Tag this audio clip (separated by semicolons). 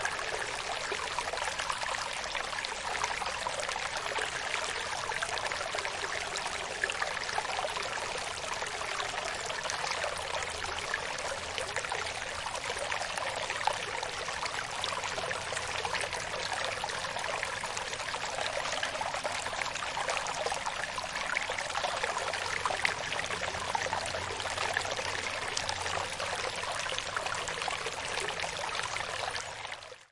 brook; creek; flowing; river; running; stream; water